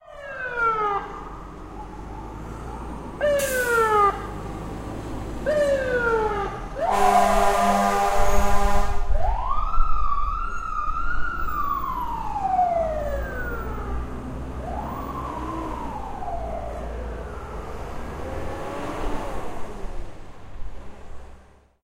fire truck short good w horn
New York City firetruck with sirens and horns in traffic.
FDNY, firetruck, horns, siren